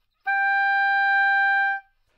Part of the Good-sounds dataset of monophonic instrumental sounds.
instrument::oboe
note::G
octave::5
midi note::67
good-sounds-id::7979
neumann-U87, multisample, G5, oboe, good-sounds, single-note